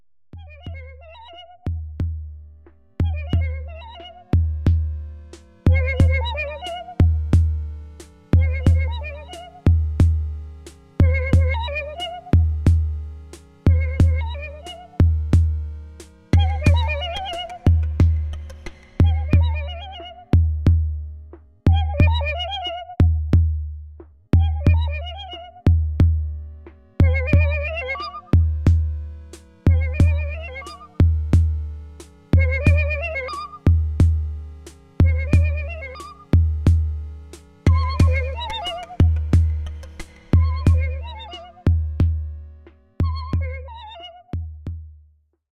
VCV rack [25thJuly2020]
A few seconds of today's tinkering.
VCV rack patch:25thJuly2020
modular, patch, rack, software, synth, synthesizer, vcv